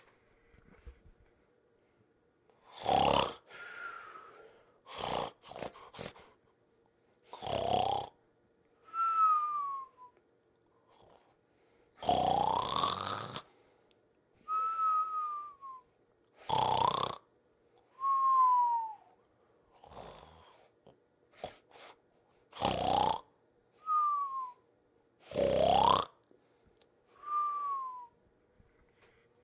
Cartoon snoring that is really drawn out.